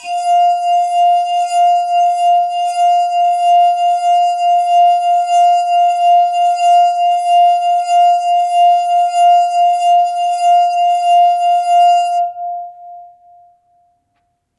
Water GlassPitch F4
A glass filled with water to pitch match a F4 on the Piano
Water-Glass, Glass, Water, F4, Chord, Pitch